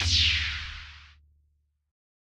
Created by layering synths on Logic Pro X.